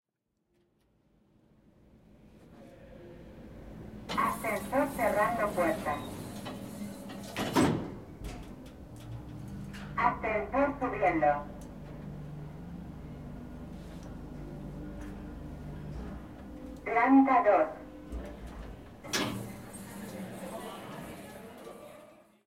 The sound when you're inside of an elevator. This sound has been equalized for the voice to sound brighter
down, elevator, enviromental, lift, machine, sound, up, voice